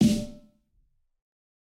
Fat Snare of GOD high tune 026
Fatter version of the snare. This is a mix of various snares. Type of sample: Realistic
tune, high, realistic, drum, god